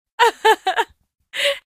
Woman Laughing 2
Authentic Acting of Laughter! Check out our whole pack :D
Recorded with Stereo Zoom H6 Acting in studio conditions Enjoy!
female, Funny, getting-tickled, happy, humor, Laugh, tickled, tickling, vocal, voice, woman